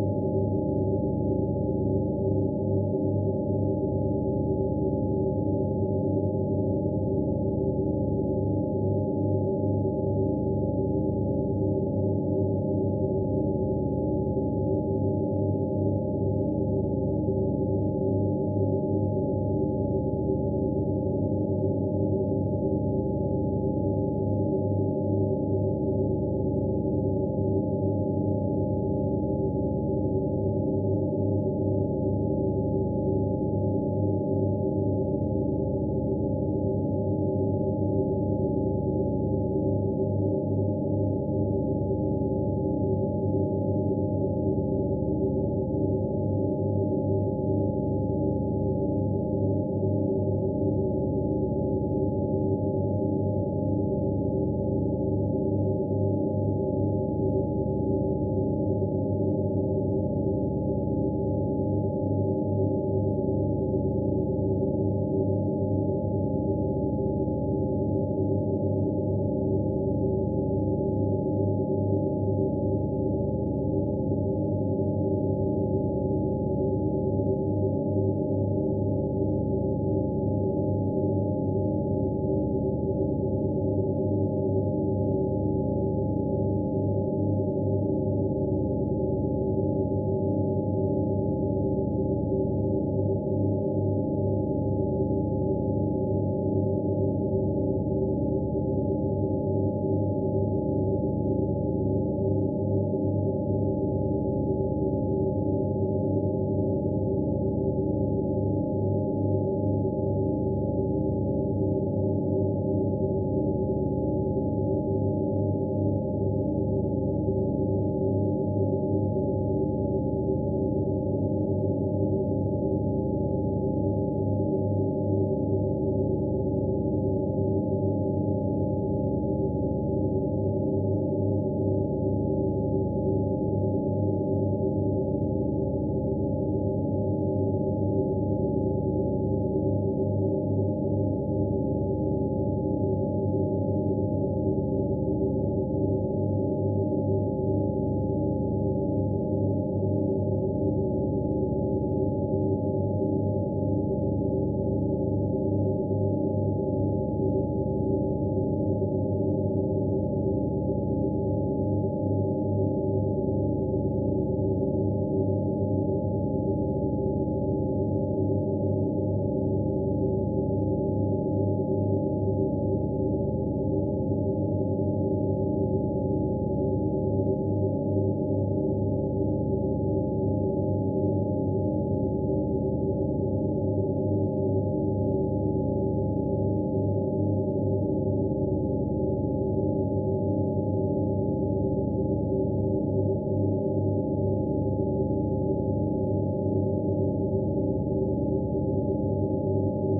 BeeOne Loop 20130528-143602
Mad Loop made with our BeeOne software.
For Attributon use: "made with HSE BeeOne"
Request more specific loops (PM or e-mail)
background, ambient, experimental, loop, electronic